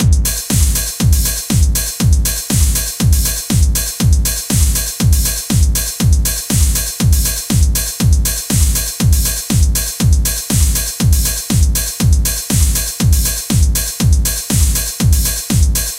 PapDrum 3 4/4 120bpm
This is part 3 of the same drum line from a recent song I made.